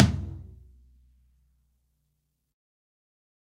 Low Tom Of God Wet 009
drum, drumset, kit, low, pack, realistic, set, tom